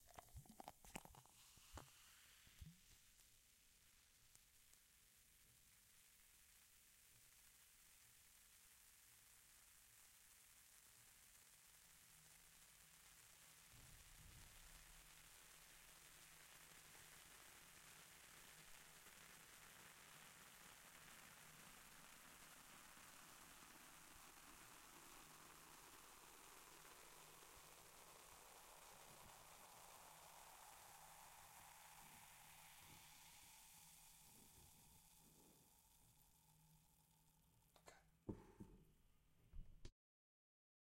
The sound of a glass being filled with a soft drink.